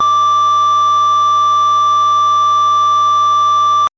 LR35902 Square D7
A sound which reminded me a lot of the GameBoy. I've named it after the GB's CPU - the Sharp LR35902 - which also handled the GB's audio. This is the note D of octave 7. (Created with AudioSauna.)
square, synth